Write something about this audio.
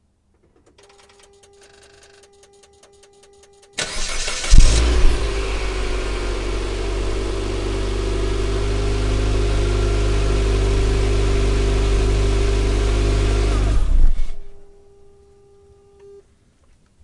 Lancer Startup and shut down
Starting up a 2011 Mitsubushi Lancer.
The sound is a mix of the engine and exhaust together.
automatic, CVT, down, electronic, engine, evo, evolution, exhaust, ignition, keys, lancer, manual, mitsu, shut, transmission, up